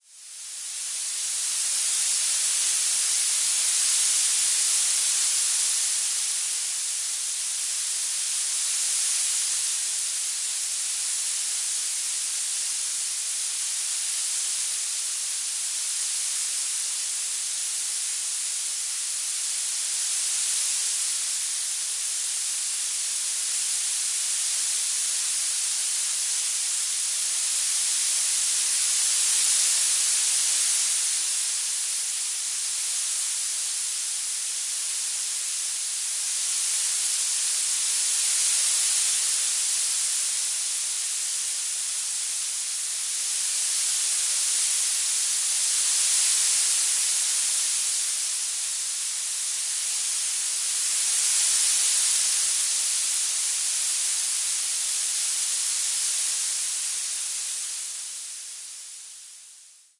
This sample is part of the "Space Drone 3" sample pack. 1minute of pure ambient space drone. Slowly evolving noise.
ambient, drone, reaktor, soundscape, space